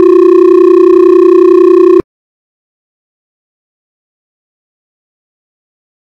old-ringback-us-with-correct-cadence
Old-sounding ringback tone
old, ringback, telephone